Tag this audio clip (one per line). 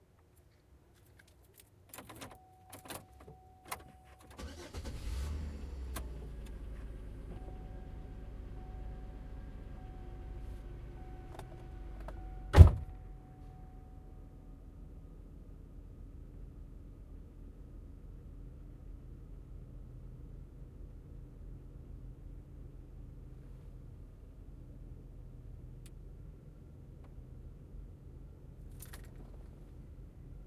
backgrounds
field-recording
ambience